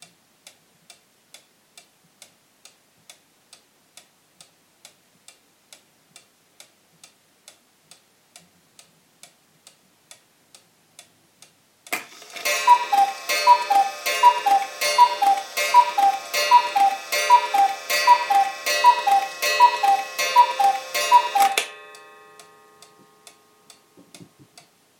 Cuckoo-clock
The ticking and striking of the cuckoo clock.
bird, clock, cuckoo, mechanical, recorder